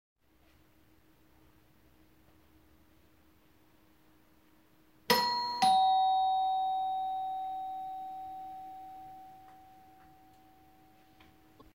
ding dong doorbell sound
chime, ringing, door, ring, doorbell, bell, rings